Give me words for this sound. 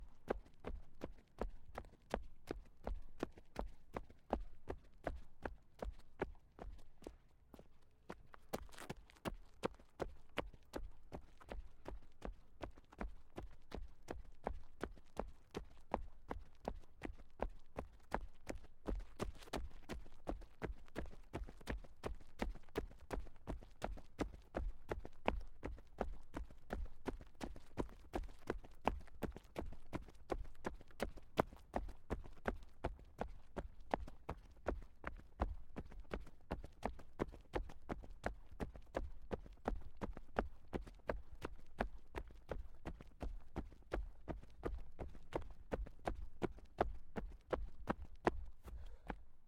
footsteps jog flat sneakers
The sound of flat sneakers jogging on concrete. Recorded with a Sennheiser MKH60 using a Sound Devices 744T. Microphone is at the end of a short boom pole.
sneakers, jogging, running